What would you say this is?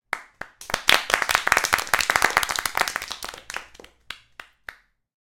Crowd Clapping 1 1
Clapping, People, Sound, Cheering, Cheer, Clap, Foley, Crows, Person